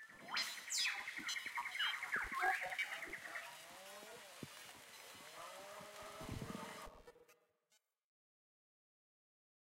Glitched Birds
a glitched version of a bird field recording
DAW: FL10
process: glitchmachines plugins
orginal sample: Sun In Aquarius / Free Foley Pack
birds, tropical, rainforest, jungle, fieldrecording, songbird, glitch, exotic, parrot, bird, zoo, aviary, field-recording